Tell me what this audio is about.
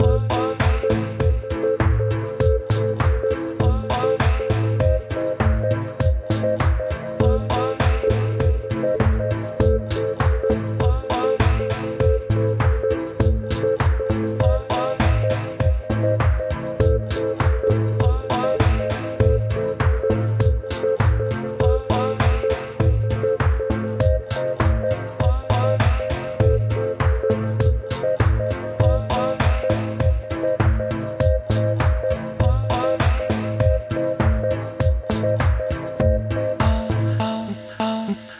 Solomon house loop.
Synths:Ableton live,Silenth1,kontakt.